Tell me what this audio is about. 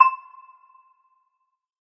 This is the first in a multisampled pack.
The chimes were synthesised then sampled over 2 octaves.
This is the note C.
chime, metallic, one-shot, short, synthesised